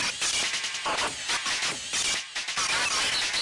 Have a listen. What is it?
Murdered Drum
A few sample cuts from my song The Man (totally processed)